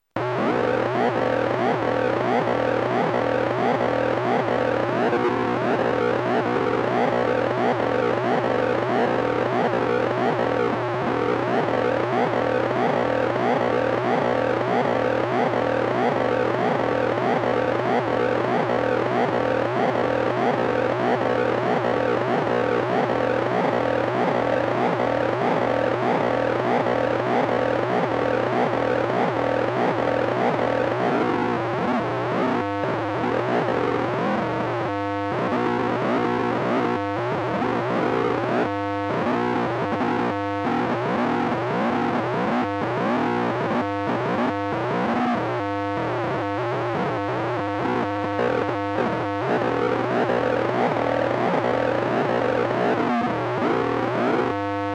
Colorino light probe, old Astron Power Supply LED light
Holding the Colorino in front of the LED at the on-off switch of my old Astron power supply that powers my Icom 2-meter ham radio and amplifier. This is one of those AC LED's with a somewhat complex modulation pattern. The sound got more complicated toward the end as I tried to put my finger in there without interrupting the light to turn off the switch. The light comes right out of the switch, so I couldn't do it.
The Colorino Talking Color Identifier and Light Probe produces a tone when you hold down the light probe button. It's a pocket sized 2-in-1 unit, which is a Color Identifier/Light Detector for the blind and colorblind. The stronger the light source, the higher the pitch. The more light it receives, the higher the pitch. So you can vary the pitch by moving and turning it.
Recorded from line-in on my desktop using Goldwave. Low-pass filter was applied to lock out the 16khz sampling frequency.
60hz-buzz, AC, accessibility, Assistive-technology, blind, buzz, color-blind, color-detector, electronic, experimental, fm, frequency-modulation, growl, LED, LED-light, light-probe, light-to-sound, modulated-light, modulation, noise, playing, scifi, tone